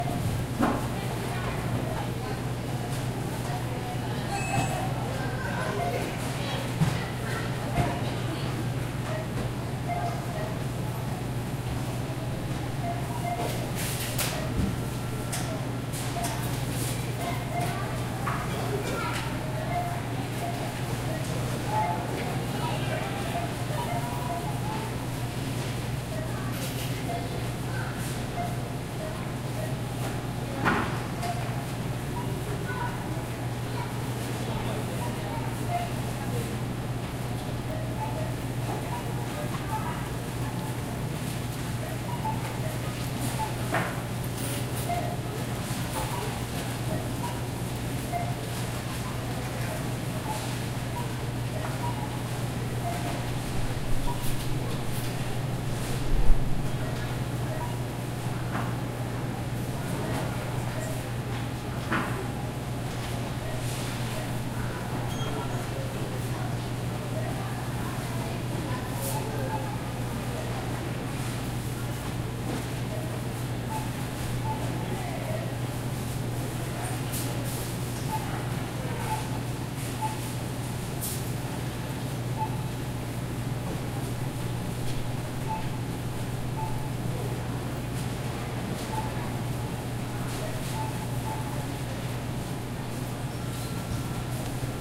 Recorded with Zoom H4n
On board Stereo Mics
The ambience of the checkout area of Walmart.